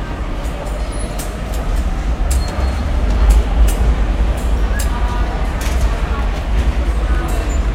I recorded the ticket machines at Wynyard Station, Sydney. Made with a Zoom H4n
Station, Ticket